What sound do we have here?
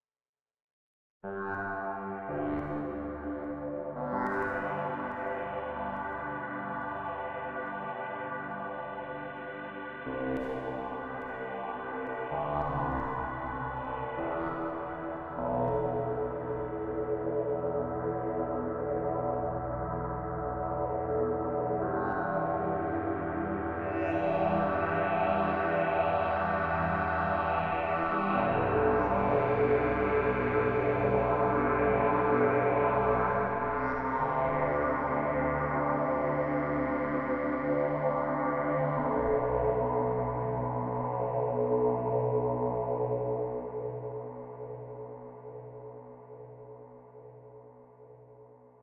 A dark ambient pad sound. Synthesized with a free vst instrument. Can be useful in different types of compositions